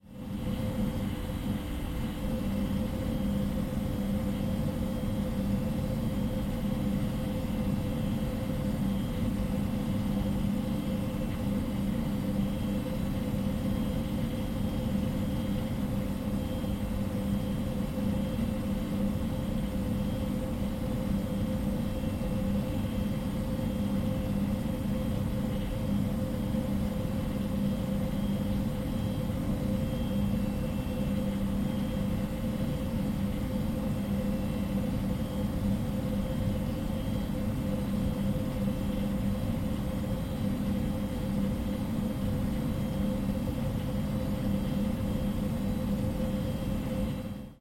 old computer
An old desktop computer running. Mic a bit too close to the ventilator and resting on the cabinet. High frequency hard drive noise clearly annoying.
exhaust, hard-drive